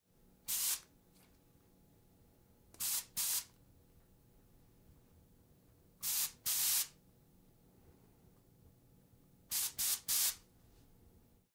Me spraying from an aluminum spray bottle.
can, aero, spraycan, air, aerosol, spray, paint